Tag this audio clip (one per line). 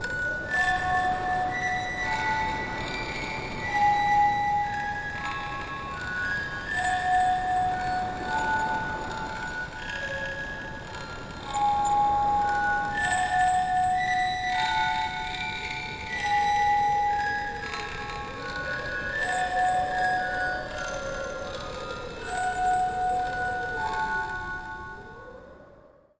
Creepy
Erie
Horror
Lullaby
Old
Scary
Strange